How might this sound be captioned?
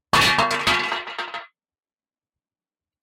shovel drop on concrete
Sound of a shovel being dropped on a concrete driveway
concrete, drop, Shovel